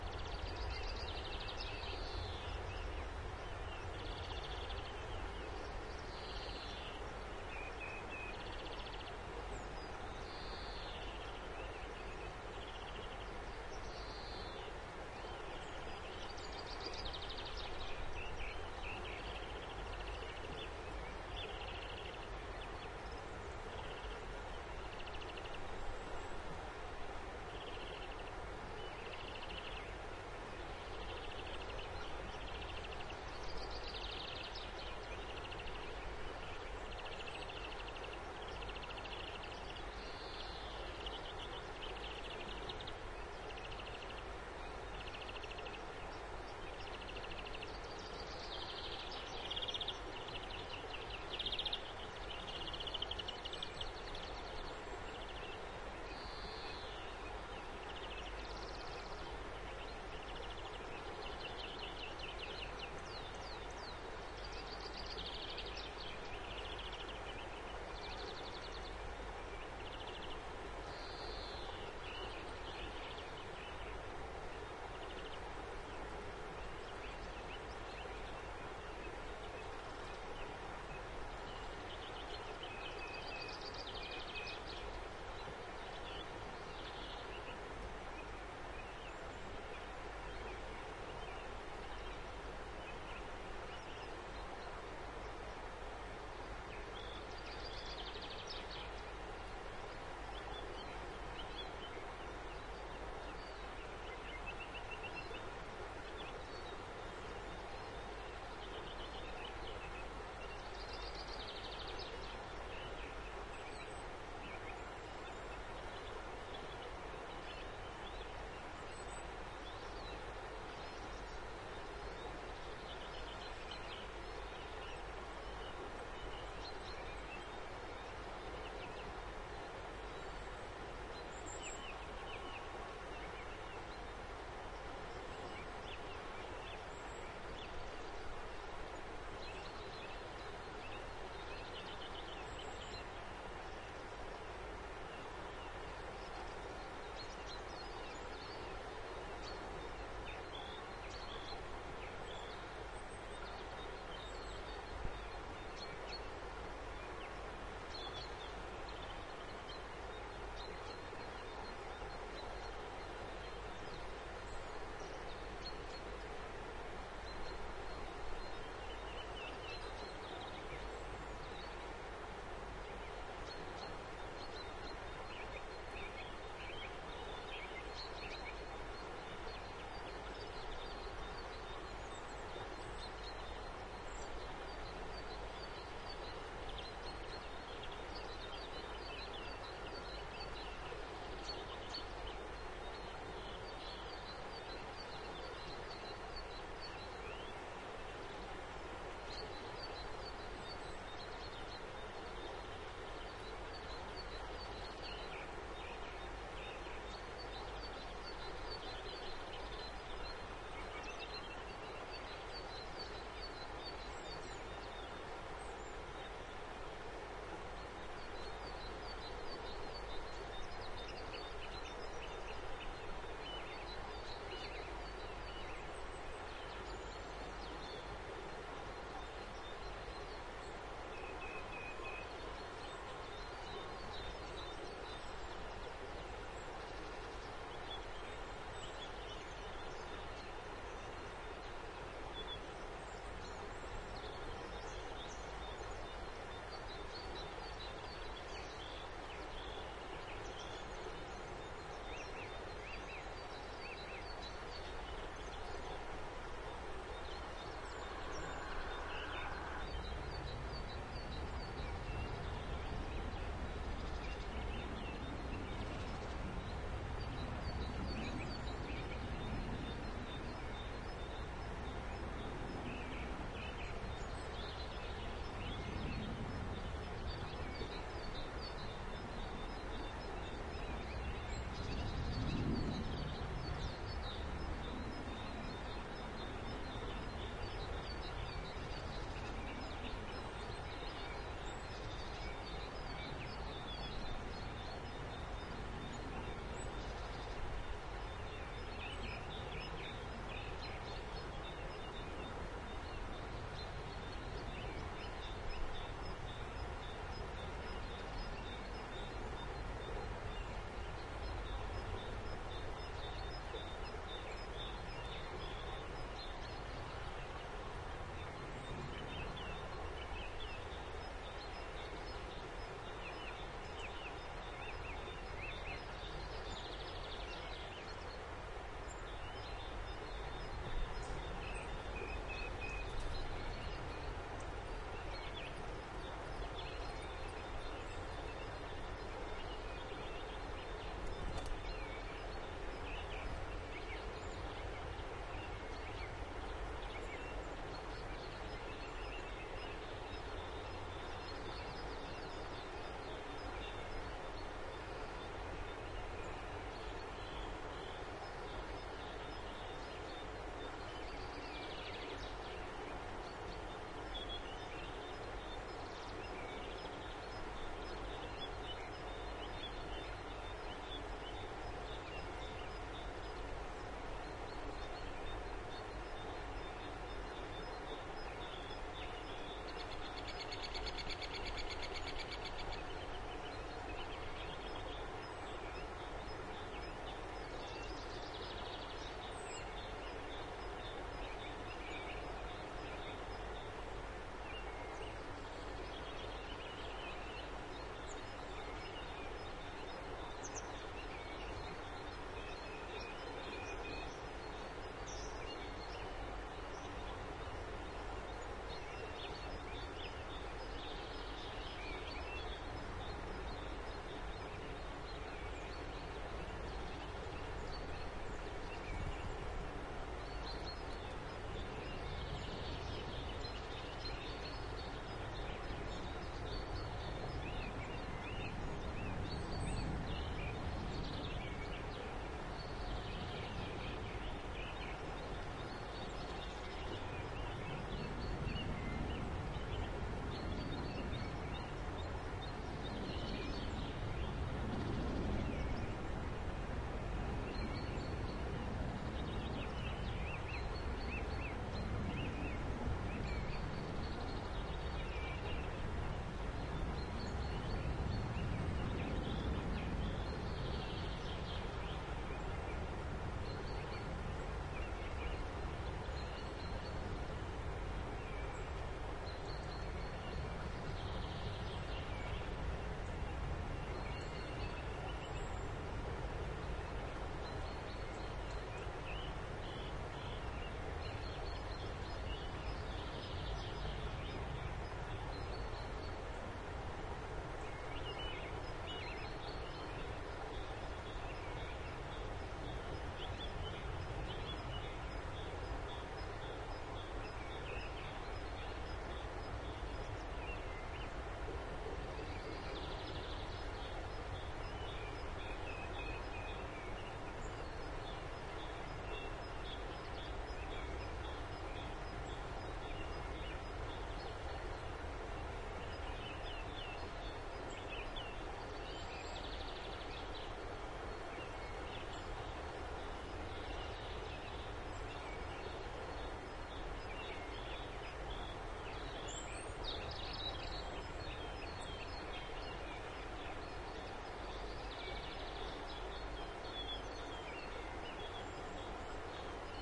birds and river
The river was just too loud, the birds to far away for a decent recording of a dawnchorus on a frosty morning at the end of March in the Harzmountains, especially that I went back to bed : ) after I have set the gear up.Shure WL 183 microphones, FEL preamp into Sony PCM-D50.
dawnchorus, field-recording